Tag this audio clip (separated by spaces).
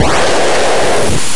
retro decimated chippy 8-bit lo-fi arcade vgm chip noise video-game chiptune